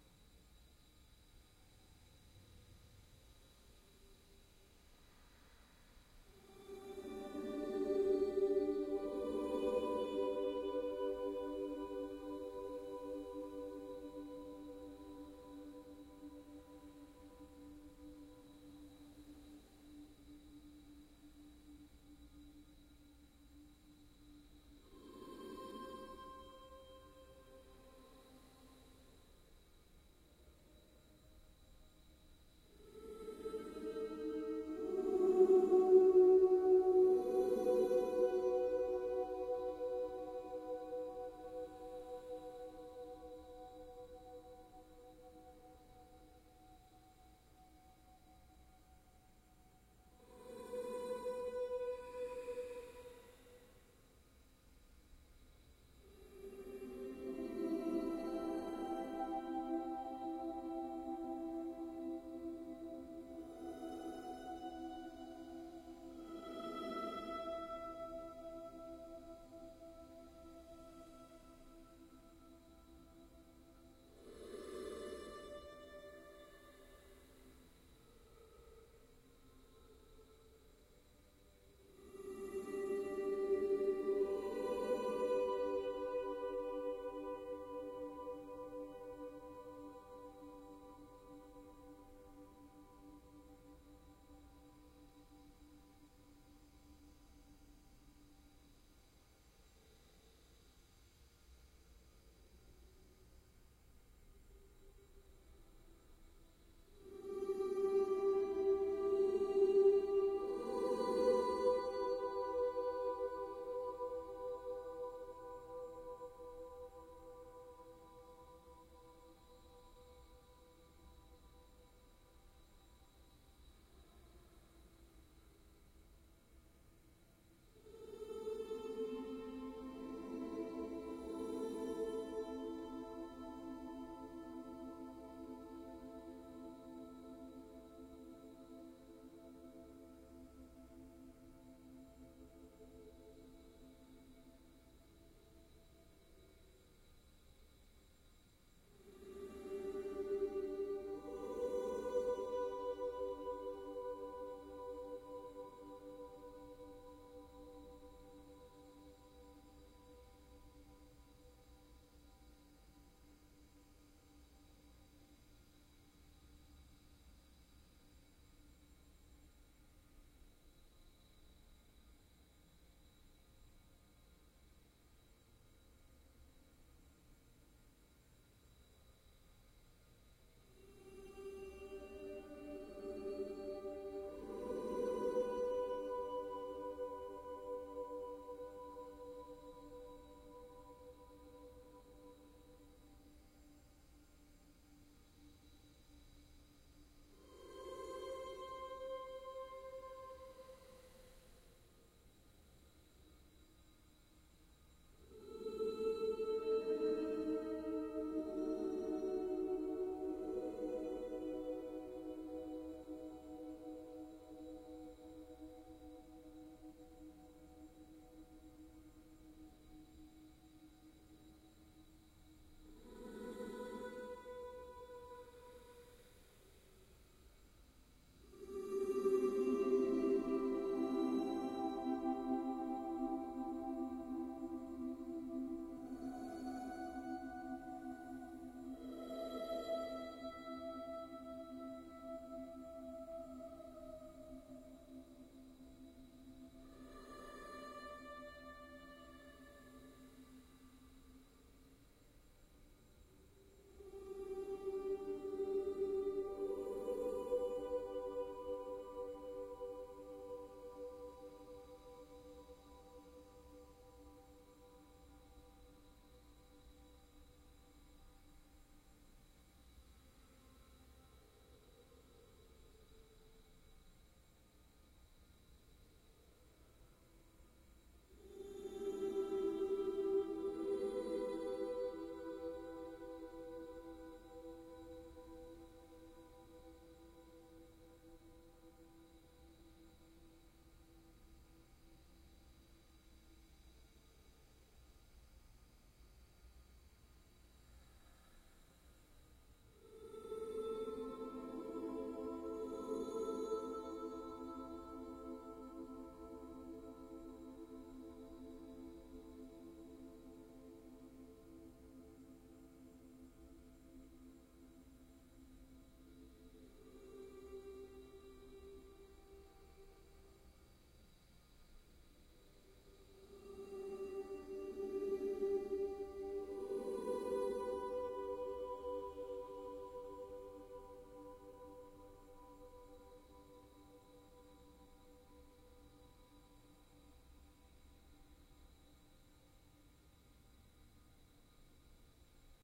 STE-004 Edit
Ukelele sample edited to a drone